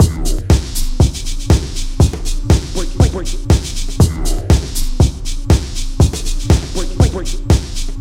120bpm Loop P105
Processed acid-loop 120 bpm with drums and human voice